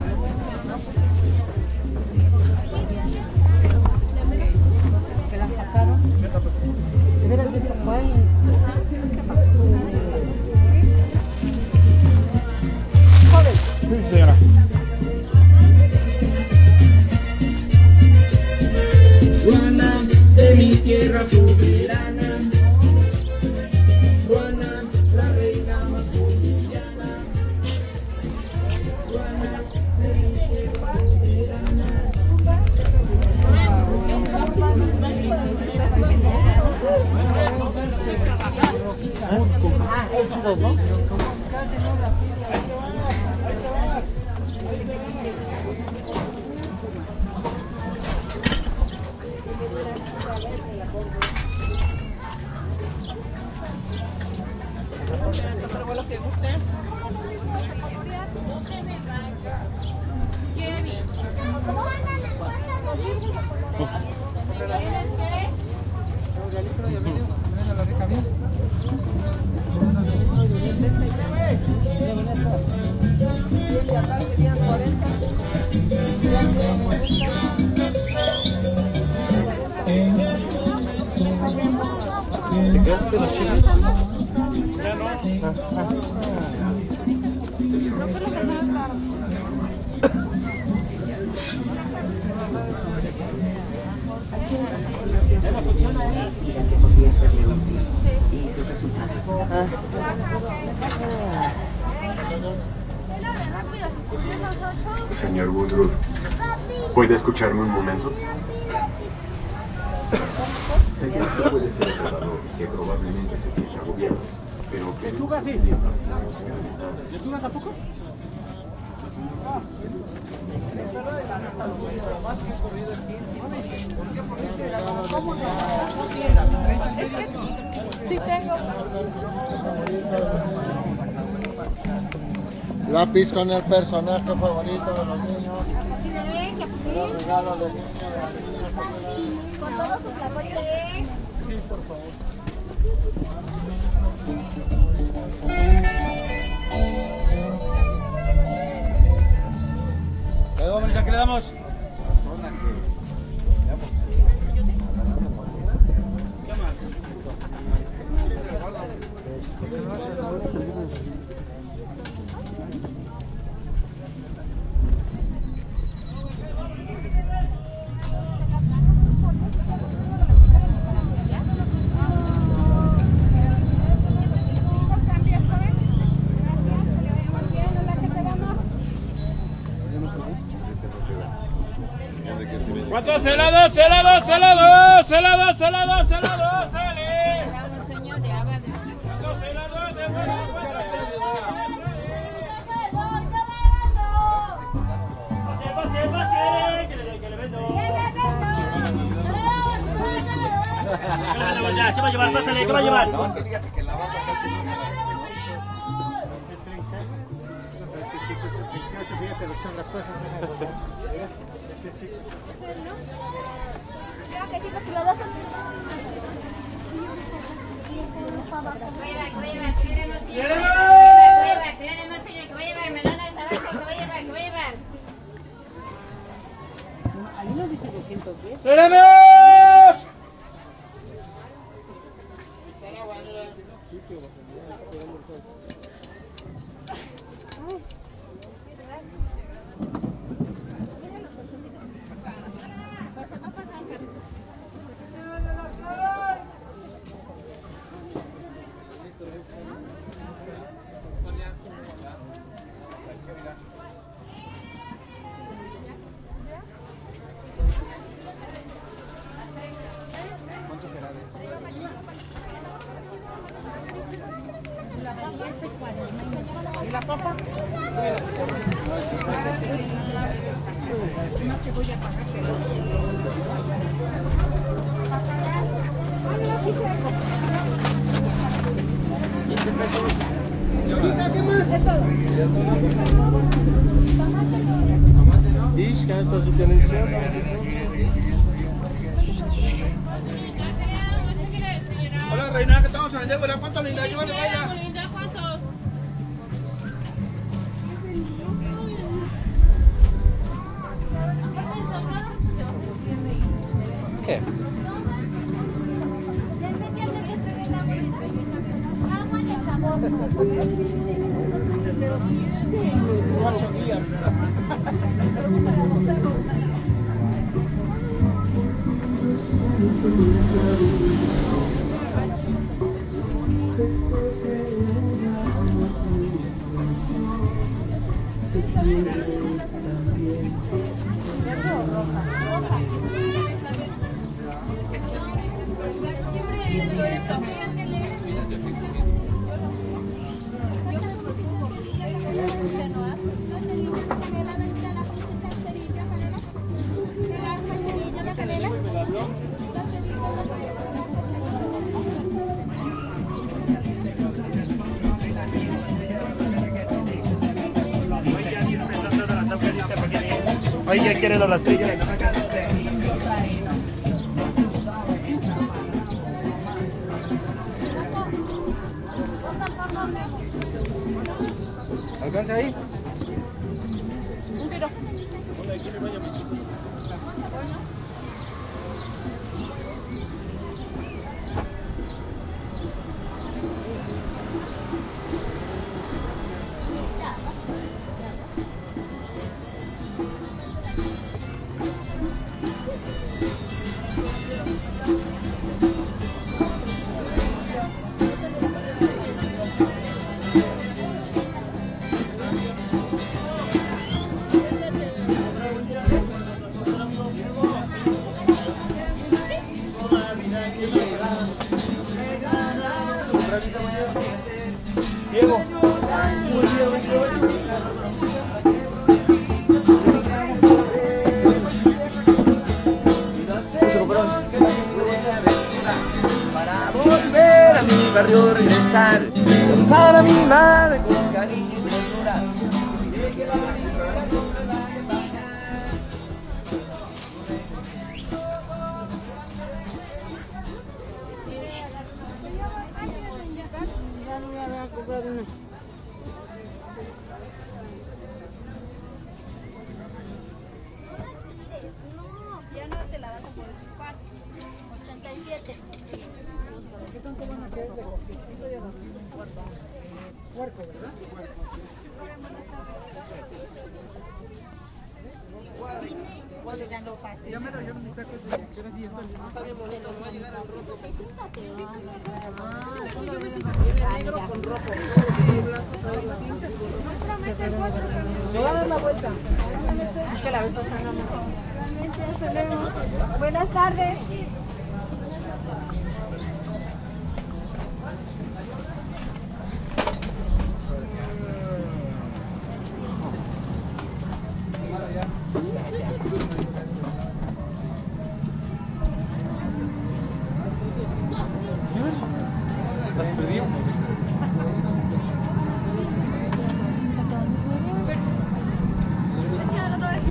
grabación de un tianguis (mercado móvil) en méxico. recording of a mexican flea market.
tianguis, flea, mercado, people, gente, calle, market